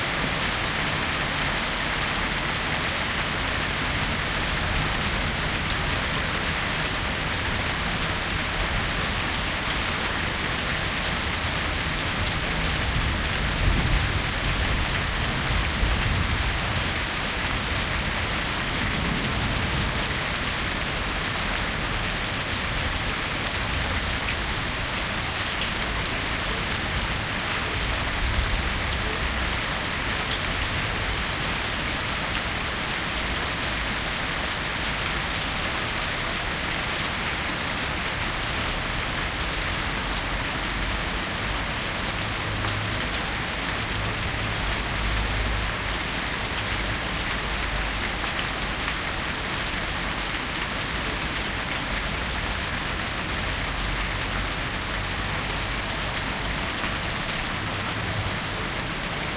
Rain in garden
Recording of a rainstorm in my garden.
weather, atmo, rain, ambient, rainstorm, field-recording, grass